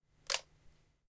Camera Flash, Lift, E
Raw audio of lifting up the built-in flash light on a Nikon D3300 camera.
An example of how you might credit is by putting this in the description/credits:
The sound was recorded using a "H1 Zoom V2 recorder" on 17th September 2016.
nikon, raised